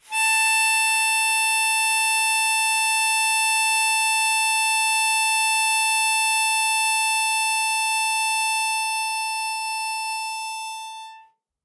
Harmonica recorded in mono with my AKG C214 on my stair case for that oakey timbre.
f, harmonica, key